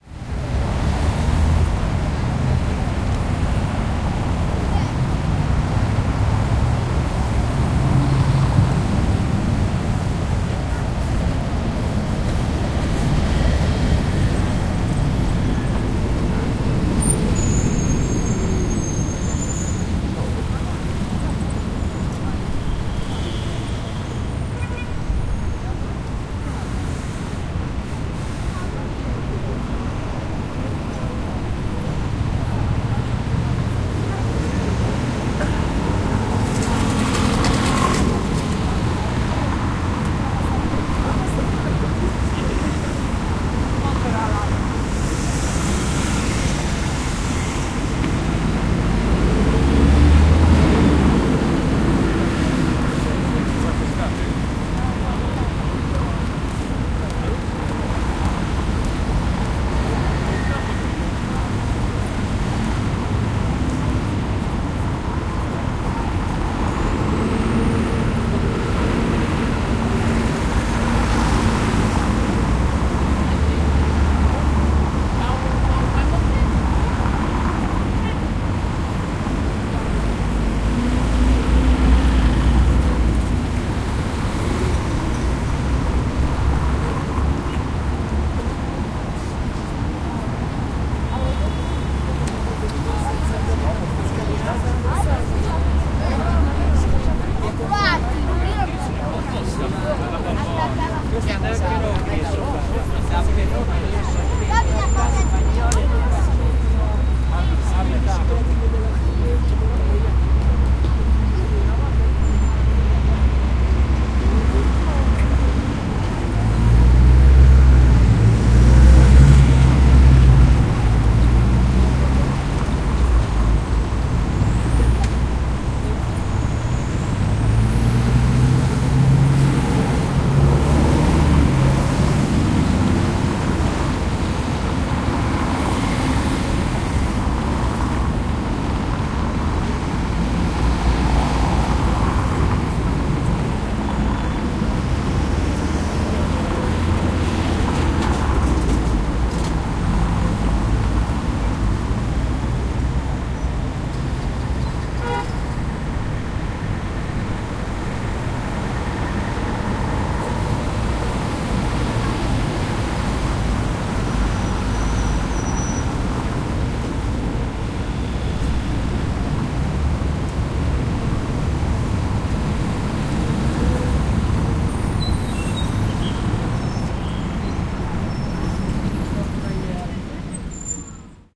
Traffic in Rome
Rome has beautiful places but is also a noisy city. Traffic sounds echoes all over Rome, making a pin-pon match on the stone walls.
Roma tiene lugares preciosos pero es también una ciudad ruidosa. El sonido del tráfico se repite en toda Roma, como si de una partida de pin-pon en sus muros de piedra se tratara.
Recorder: TASCAM DR40
Internal mics
handheld-recorder, Roma, Landscape, dr40, travel, Traffic, Trafico, Rome, Ruido, Coches, Field-recording, tascam, City, Cars